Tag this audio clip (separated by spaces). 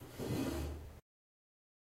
floor dragging